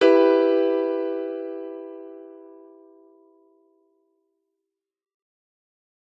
Em - Piano Chord
E Minor piano chord recorded with a Yamaha YPG-235.